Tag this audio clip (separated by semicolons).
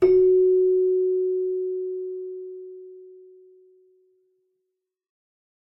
bell; celesta; chimes; keyboard